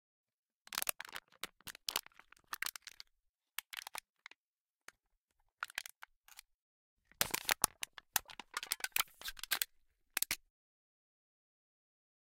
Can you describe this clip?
Recorded two can / tins with beer